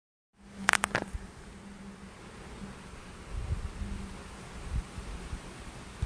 Cracking Knuckles
Loud sound of knuckles cracking. I had to get my brother to do this, (I've never been able to do it!)